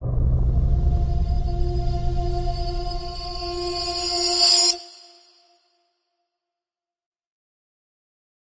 Metal Spawn 4
Tweaked percussion and cymbal sounds combined with synths and effects.
Effect, Rising, Spawn